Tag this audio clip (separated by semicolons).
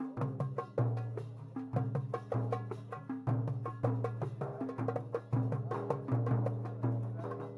ambient; drums; Moroccan